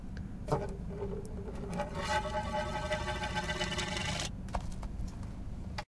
A CD spinning on the table.